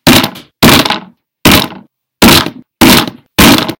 It's Just Me Slamming On My Desk. Nothing Special. It Sounds Like Breaking A piece Of Wood So Thats Cool. that little marble drop sound you hear is my earbud bouncing from me hitting my desk.